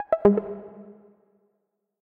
plucked bass
synth, techno, fx, electronic